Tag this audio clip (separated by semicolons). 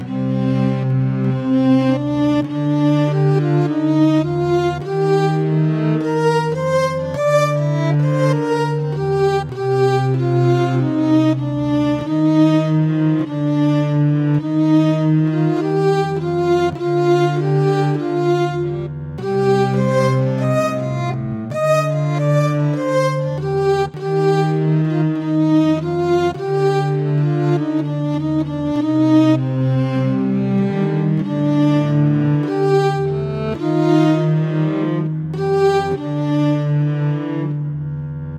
loops; loop; improvised; classical; iceland; 100bpm; gudmundsson; instrumental; music; larus; loopable